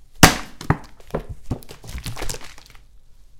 bottle hit 12
Recording of a bottle of water being thrown against my chest or into a bucket containing more bottles and water. Recorded using a Rode NT1 microphone.